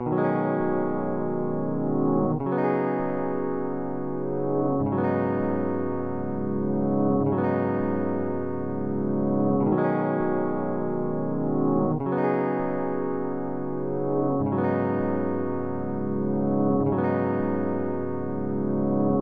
Piandolin chord progression - DETUNED ORIGINAL
Mandolin + reversed Piano Chord Progression Loop
D69-E9sus4-Bminor11-Bminor11 played twice @120bpm detuned to 100bpm
100bpm, chord-progression, chords, Loop, Mandolin, music, Piano, Sample